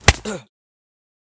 Impact Male Voice